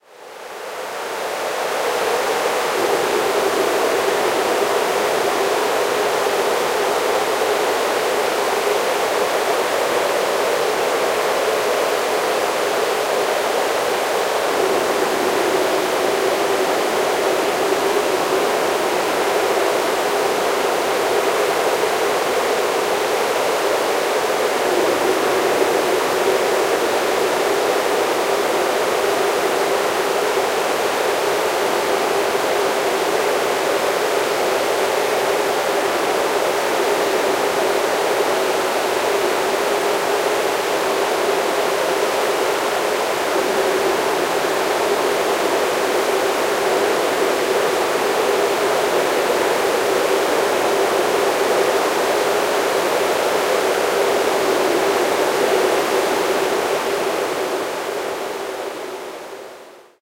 This sample is part of the "Space Drone 3" sample pack. 1minute of pure ambient space drone. Stormy weather.
ambient, drone, reaktor, soundscape, space